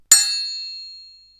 SFX for a sword fight-- short hit